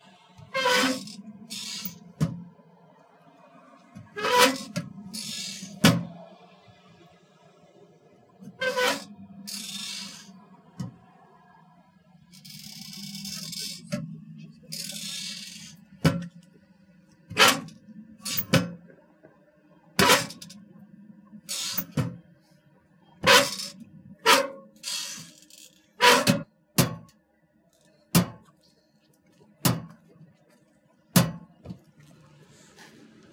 heavy squeak
The sound of a mailbox making a screeching noise
door, h4nzoom, at875r, field-recording, mailbox, hinge, outdoors, xlr